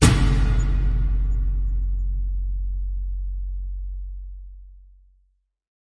Low Metal Hit 1
Cinematic Metal Hit
Hit, Impact, Metal